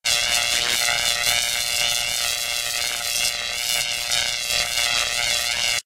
Electric Arc Phased 2
synthesized electrical zapping. could be cut up for smaller spark effects.
this version has more intense movement and randomness across stereo field.
arc, effect, electrical, energy, synth, zapping